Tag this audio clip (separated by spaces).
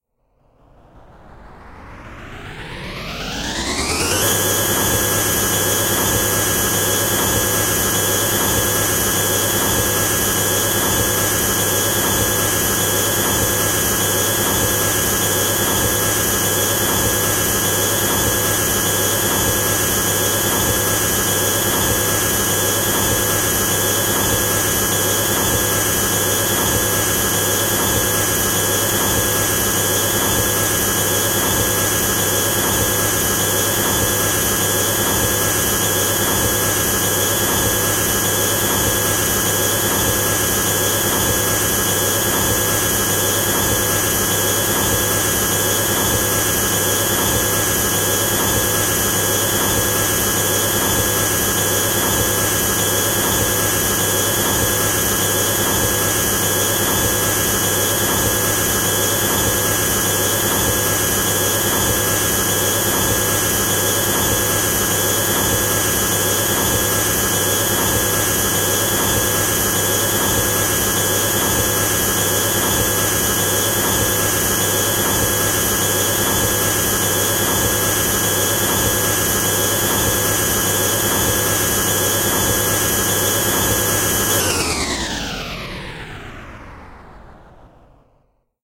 diesel diesel-engine electric-engine electronic house machine technical-sound vacuum-cleaner